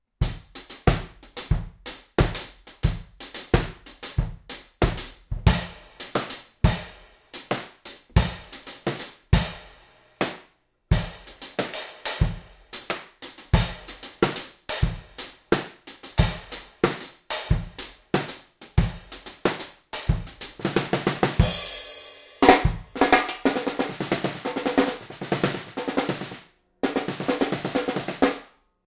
HipHop kit - straight beat 9 - flashing lights small+fat snare ride trash
Some straight drum beats and fills inspired by the song Flashing Lights by Kanye West, played on my hip hop drum kit:
18" Tamburo kick
12x7" Mapex snare
14x6" Gretsch snare (fat)
14" old Zildjian New Beat hi hats w tambourine on top
18"+20" rides on top of each other for trashy effect
21" Zildjian K Custom Special Dry Ride
14" Sabian Encore Crash
18" Zildjian A Custom EFX Crash
beat, crash, drums, flashing-lights, hihat, hip, hop, kanye-west, kick, kit, snare